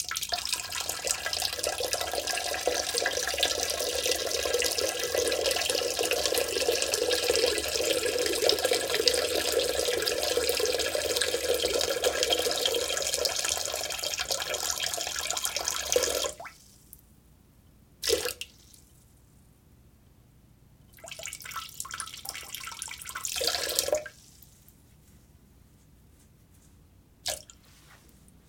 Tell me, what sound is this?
Huamn-Man-Urinating-Toilet-01
I was recording a bunch of stuff in a bathroom one day and figured I might as well capture this too.
Toilet, Pee, Man, Male, Urinate, Bathroom, Human